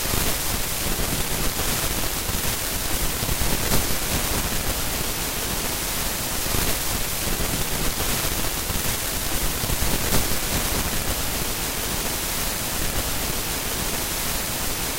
Fuzzy static noise.
Recording on my broken microphone on Audacity
effect, microphone, white, electric, fuzzy, glitch